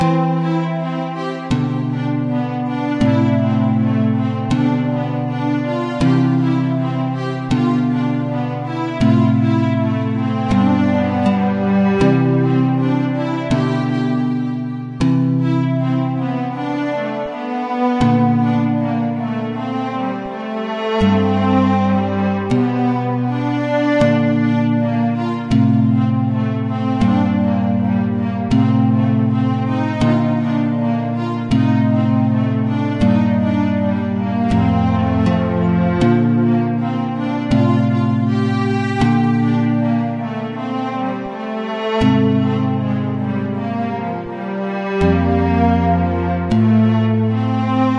short loops 01 02 2015 1a
made in ableton live 9 lite with use of a Novation Launchkey 49 keyboard
- vst plugins : Alchemy
game loop short music tune intro techno house computer gamemusic gameloop
loop, short, house, tune